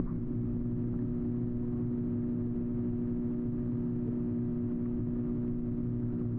Electrical Hum.R
An electrical buzzing
Buzz, Electric, sound-effects